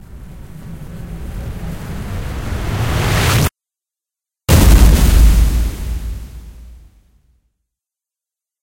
Charged laser
A laser charging then exploding. I found it very useful for Teleportation. It's been so long since I made this I can't remember.
Laser, Charge, explosion, sci-fi